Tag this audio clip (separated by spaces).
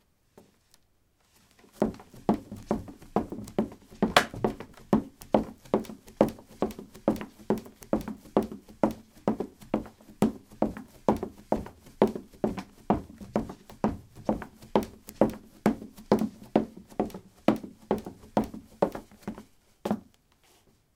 running footstep run step footsteps steps